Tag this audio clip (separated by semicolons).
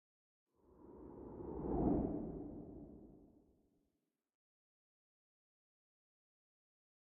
FLASH; TRANSITION; WOOSH